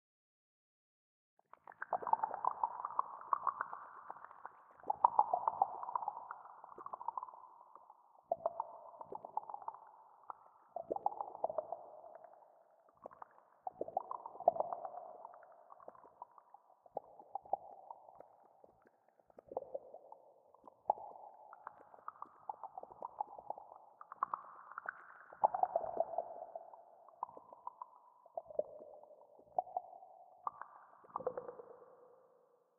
A soundscape of dripping water in a cave
cave, dripping, ambience, water